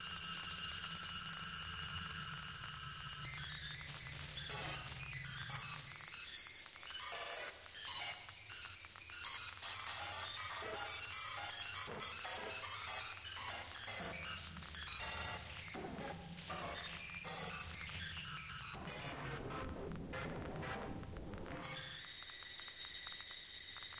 Tons and tons of random effects played over the length of transposing static noises, then more reverb, plus effects. More noise, resampled, .. etc etc.
if virus' for your computer could talk.. this is what it would sound like.